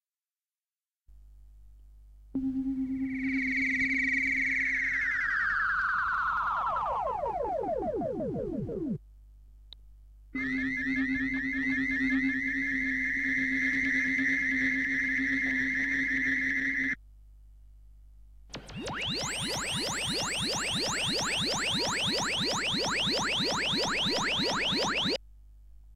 Mellotron spacey bleeps
Space bleeps from a Mellotron sound fx reel played on a Mellotron M400.